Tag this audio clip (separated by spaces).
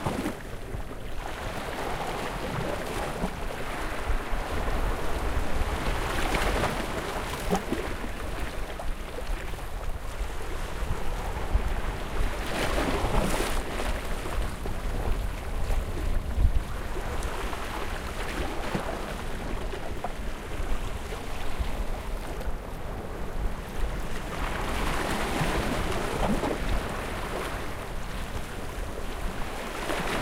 field-recording sea water waves